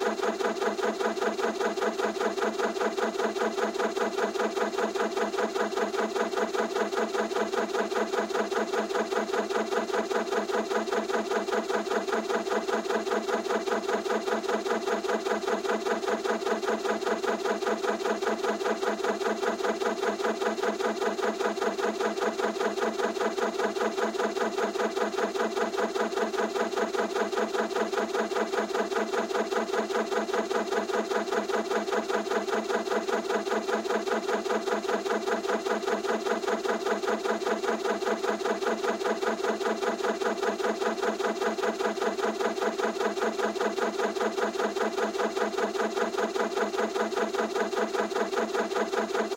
Rough Car Motor
I sound of an old car in need of repair